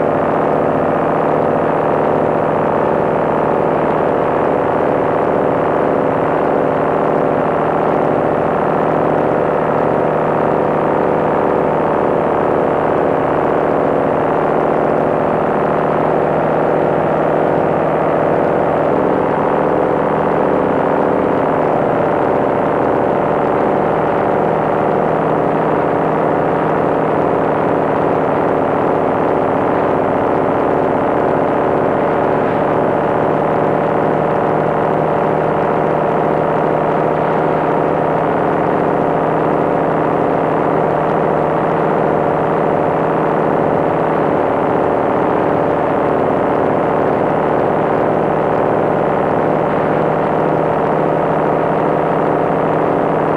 radio drone-01
A thick and noisy shortwave radio drone. Very heavy bass and mid-high AM interference.
radio, shortwave, drone